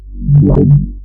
Fade in and out deep scanner sound
future, space, alien, scan, ship